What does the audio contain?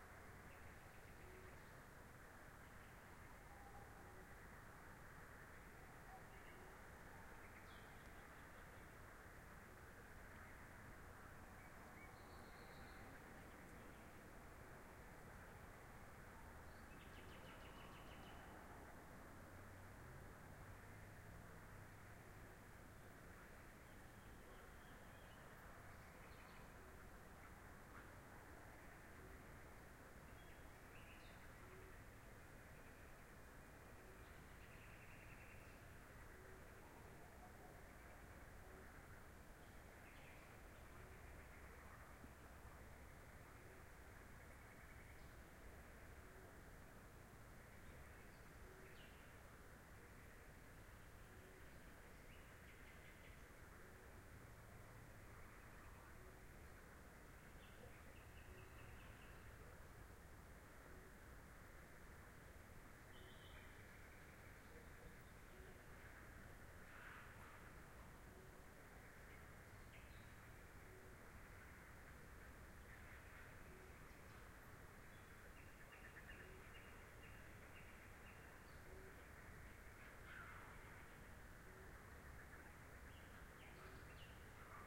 Flood plains Warta Poland Frogs birds at night 1-2
Night, Spring, Studzionka